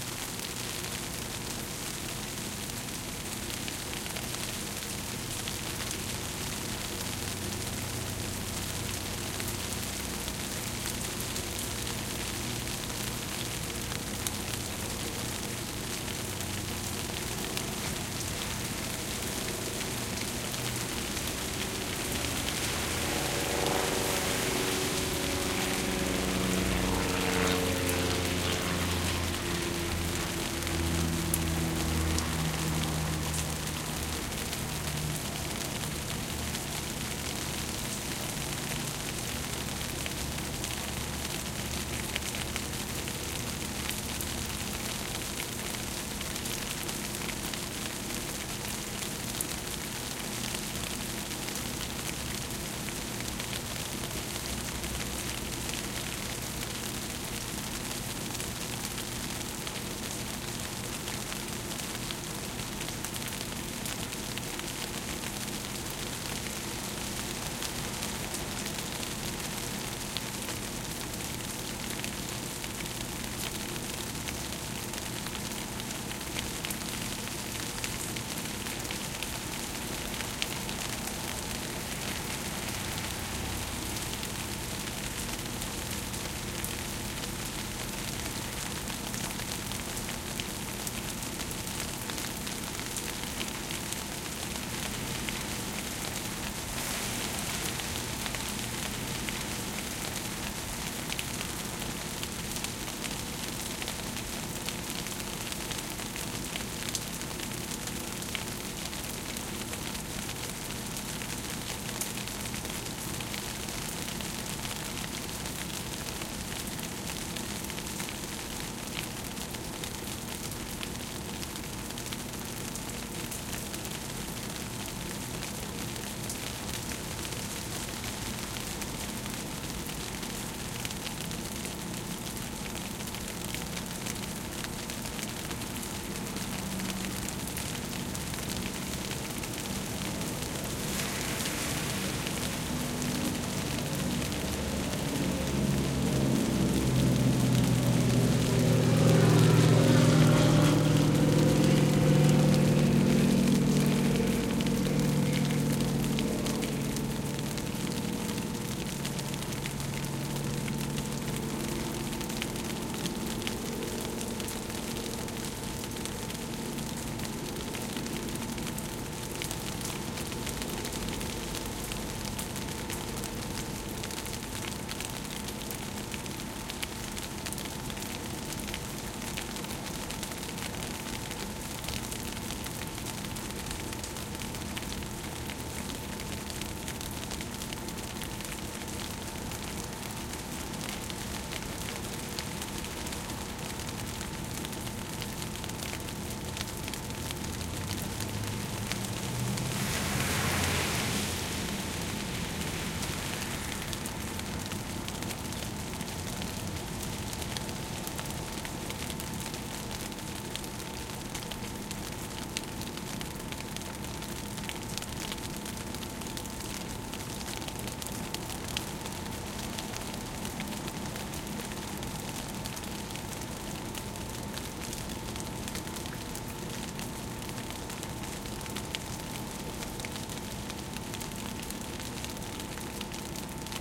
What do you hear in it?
Ben Shewmaker - Light Rain Outside Apartment
We were having our typical afternoon rain shower recently, and I decided to stick my AT825 in the window to record a bit of the light rain that was falling. You are hearing the rain drop on the bushes right outside my window as well as the low hum of the A/C in the background along with the occasional plane and car passing by. Recorded this straight into my computer.
aircraft, cars, miami